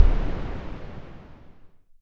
A boom sound I made using Audacity.
artillery, cannon, bomb, boom, explosion